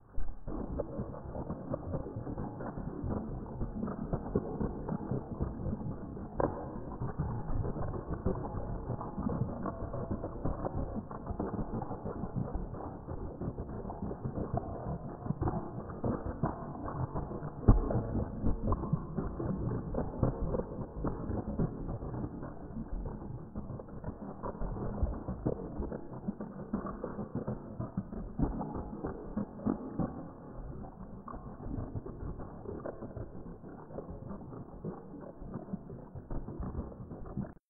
Adapted from a shaking water bottle, this could be a low, far-away rumbling machine.
race, engine, throbbing